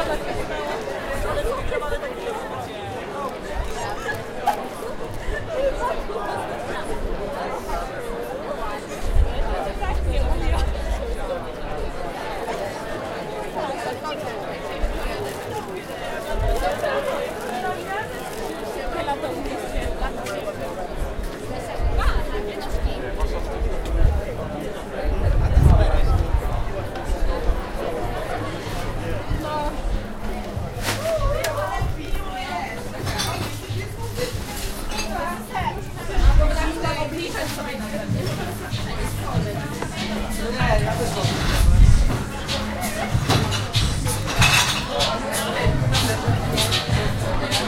talking people 4
Crowd of people in front of the club.
ambiance, city, club, crowd, enter, field-recording, klub, laugh, party, people, talking